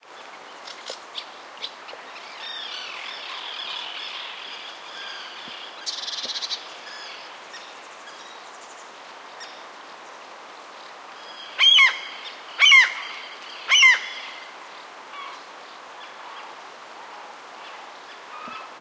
Birds at the lake/lakeside on a summer day.